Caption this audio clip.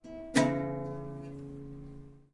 mySound GPSUK guitarstrum
open guitar strum
UK, Galliard, Primary, guitar, School